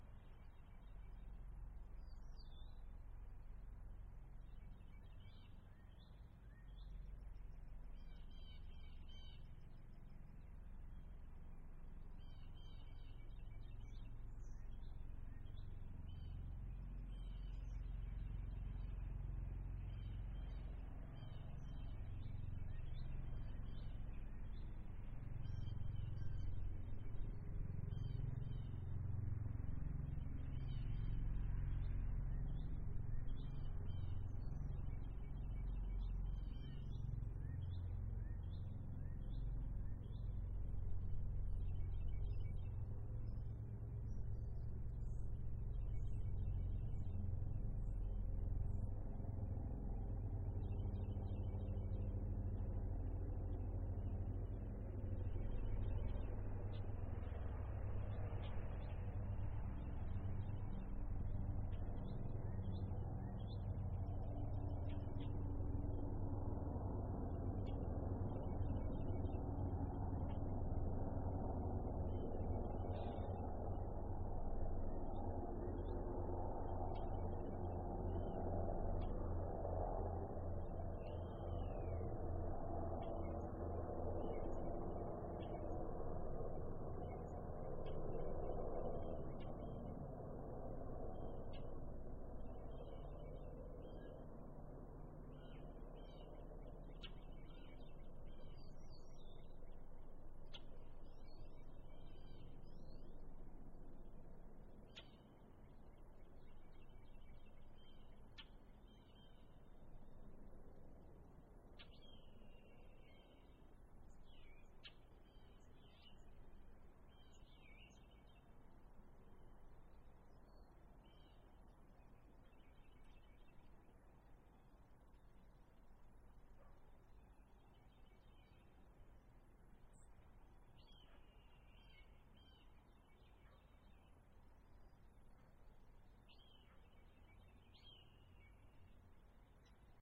Misc bird calls near helo fly-by
My backyard, early April morning (not-too-distant helicopter fly-by
nature, city, recording, light, suburban, traffic, bird, helicopter, song, field